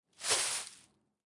Boots Dirt Foot Slide 1 3
Barefoot, Boots, Design, Dirt, Fast, Feet, Floor, Foley, Foot, Footstep, Forest, Gravel, Ground, Hard, Nature, Outdoor, Park, Real, Recording, Running, Shoe, Slide, Slow, Sneakers, Soft, Sound, Stepping, Walking